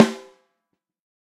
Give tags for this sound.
13x3; drum; fuzzy; multi; sample; shure; sm57; snare; tama; velocity

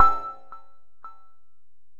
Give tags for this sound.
delayed; electronic; mallet; multisample; reaktor

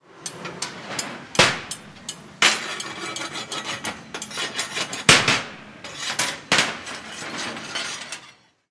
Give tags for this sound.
machinery; field-recording; metal-movements; factory; industry

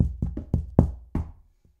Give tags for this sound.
sounds; egoless; 0; natural; boxes; stomping; vol

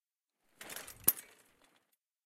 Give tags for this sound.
rider; terrestrial; chain; bike; pedaling; freewheel; whirr; wheel; street; approach; downhill

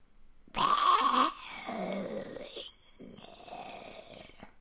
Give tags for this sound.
apocalypse; apocalyptic; creepy; game; haunted; horror; monster; scary; spooky; terrifying; terror; truevoice; undead; zombie; zombies

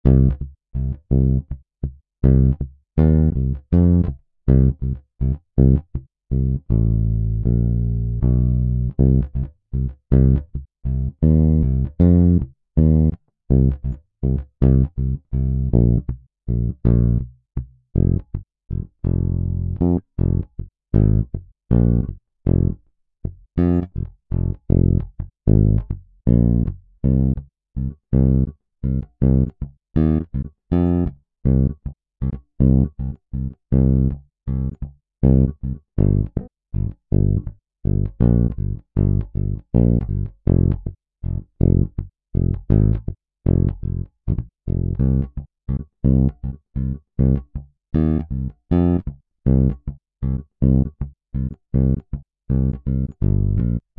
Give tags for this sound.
blues; loop; Bass; beat; 80; bpm; rythm; HearHear; Do; Chord

